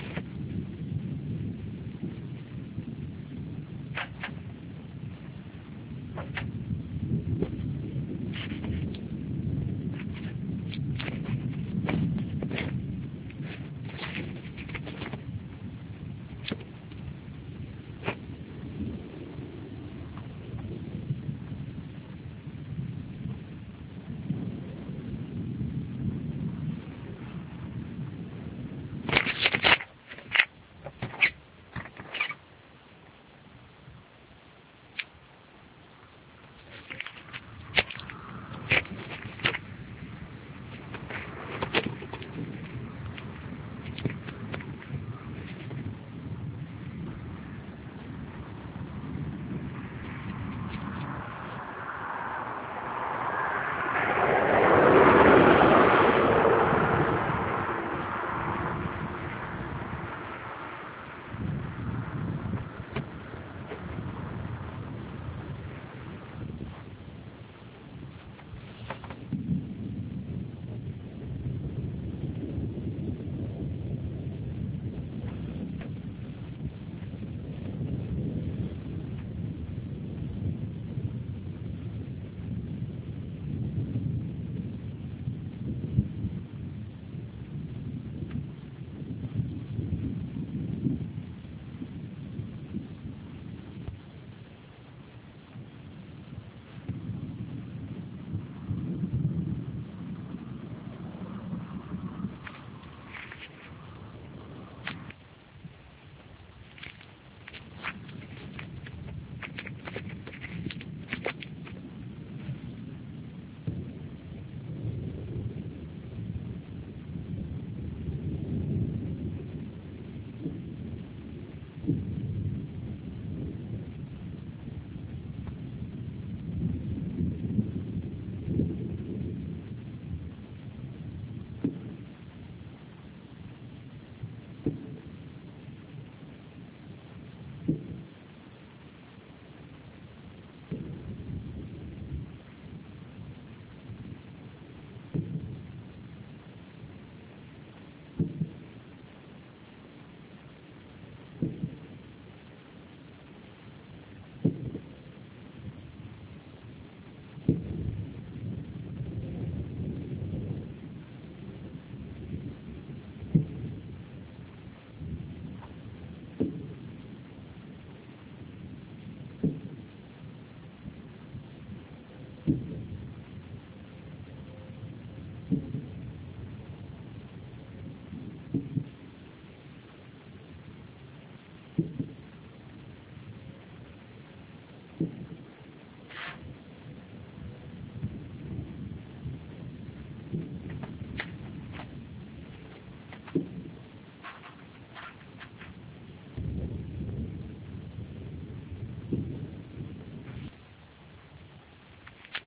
A short extract from a thunderstorm near Peronne (Somme)recorded in may 2009